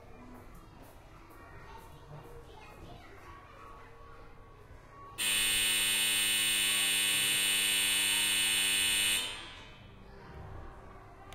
CanCladellas bell
bell, cancladellas, palau-solit-i-plegamans